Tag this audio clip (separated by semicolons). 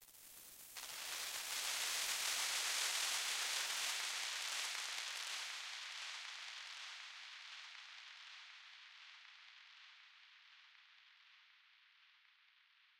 clefs; fx; keys; stretch